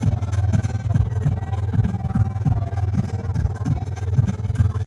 ring mod beat 1
fluttery filter of two bars of bangra style drum beat. loops . My notes say this was using a ring mod plug in but it sounds more like a v. fast phasey trem.
beat, loop, drum, flutter, bangra